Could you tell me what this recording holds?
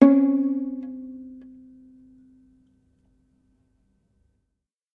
Viola Des plucked 2
I recorded a viola for a theatre project. I recorded it in a dry room, close mic with a Neumann TLM103. Some samples are just noises of the bow on the C string, then once in a while creating overtones, other samples are just simple plucked strings, other samples contain some processing with Echoboy by SoundToys.
viola, effects, strings